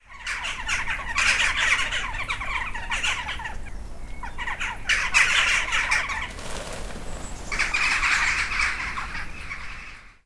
Many crows in a tree